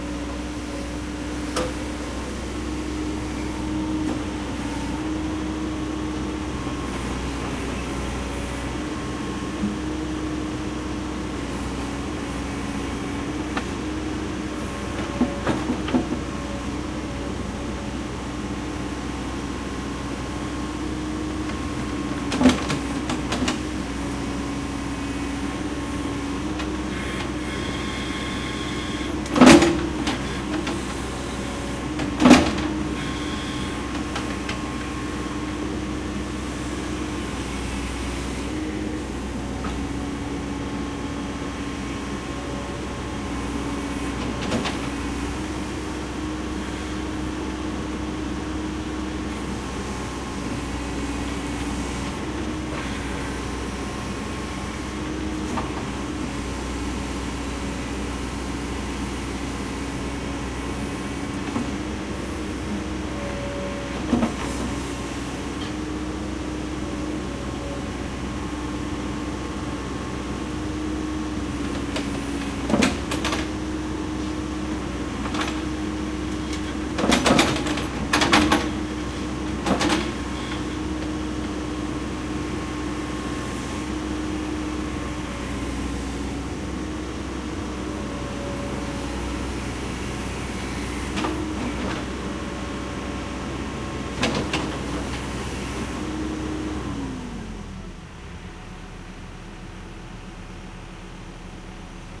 construction backhoe digging up street shovel pavement chunks into dump truck4 Montreal, Canada
backhoe, chunks, construction, digging, into, pavement, shovel, street, up